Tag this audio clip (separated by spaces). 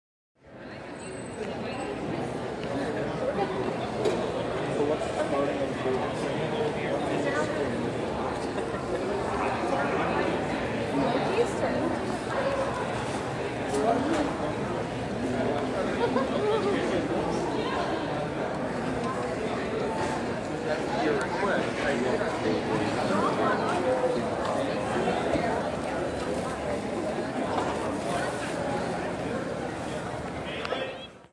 Ambience Background Crowd Sound-effect